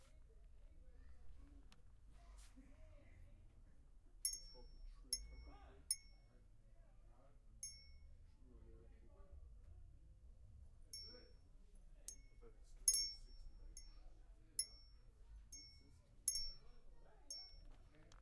metal and glass chimes
one in a series of field recordings from a hardware store (ACE in palo alto). taken with a tascam DR-05.